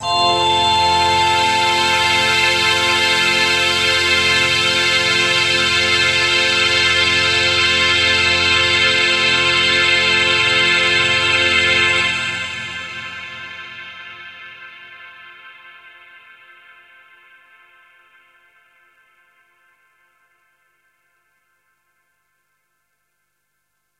Space Orchestra F3
Space Orchestra [Instrument]
Orchestra, Space, Instrument